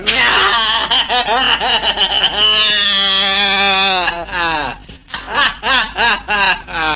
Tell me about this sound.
Manic laugh after paper tear reveal. Mono rough and ready recording.
laugh, laughing, manic